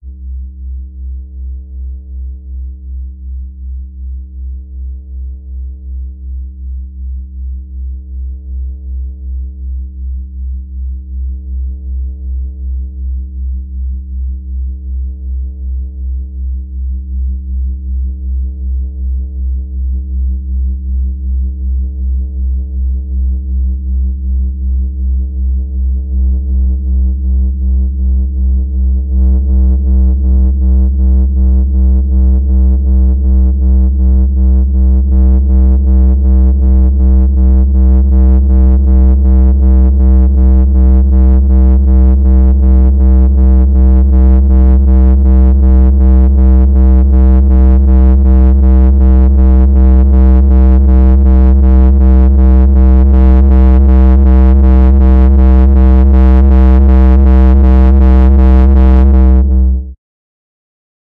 Live Sub bass riser
A basspulse rising and getting louder and heavier. Watch those subs! Cranked the drive gradually.
loud, sub